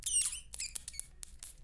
16 Ratón chillando
A mouse going "squeek"